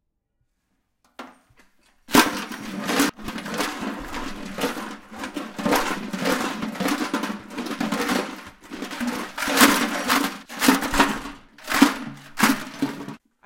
Forbes Project 1 1#07
I used a tin garbage bin. It's being shaken with objects inside that make a loud rumble, thud, and crash sound.